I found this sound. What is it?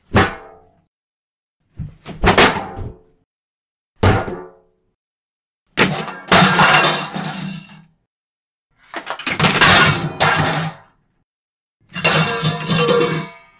metal pan crashes
A metal pan being knocked into and crashing on a wood floor (my stand-in for a metal bucket). A few of the crashes have a few footsteps before the pan is kicked.
Recorded with a Blue Yeti mic in Audacity.